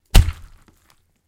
Impact with gore 8
Some gruesome squelches, heavy impacts and random bits of foley that have been lying around.